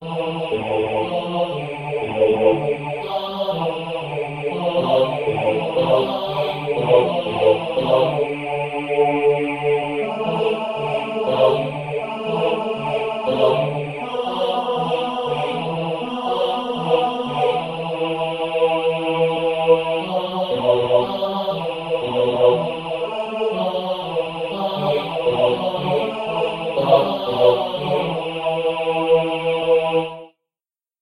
This is a short vocal clip I created using MuseScore. It isn't much of a song really, but might make for a good ambience. Minimal processing with Audacity consisting of reverb and noise cut, but it needs a "masters" touch.
ambience, ambient, atmosphere, dramatic, music, synth, tense, vocal, vocals